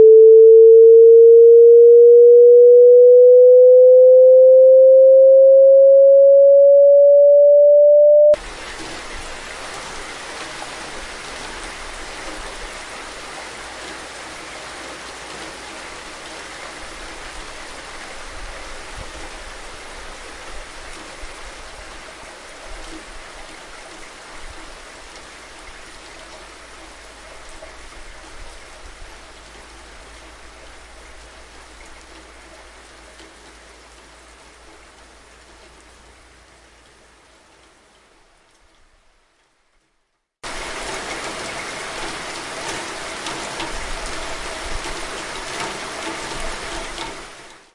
LEPROUX JEANNE 2018 2019 SONS4
"SONS4" Created and incorporate already existing sounds.
I also made a melt in closed sound.
Elementary, internet, type